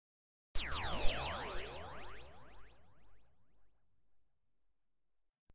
Laser shot 1
Single laser shot with following echo and swirl
sci-fi, weapon, shot, laser